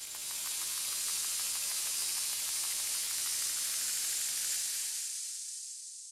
Industrial Texture (130)
130bpm, Ambient, Dark, Deep, drone, Industrial, Pad